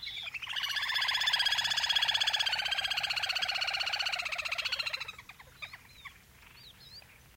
squeaks from actual birds (Coot, Great Reed Warbler, Little Grebe) which may remind a toy.